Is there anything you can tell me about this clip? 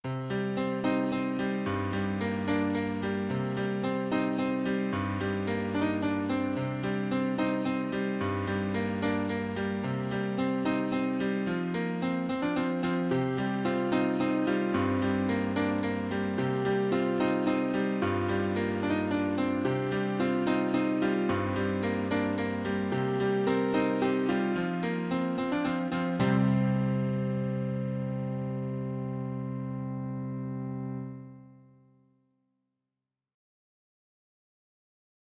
Melancholic Piano Ballad
A short bit of melancholic piano playing.
playing, melancholic, melody, sad, piano